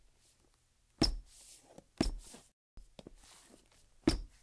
Shoe Squeaks 2-02
shoe squeaking on tile floor
floor, shoe, squeaks